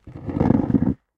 Rock Scrape 2
A stereo field recording of a granite rock being slid along granite bedrock. Rode NT-4 > FEL battery pre-amp > Zoom H2 line-in.
bedrock, field-recording, grind, rock, scrape, stereo, stone, xy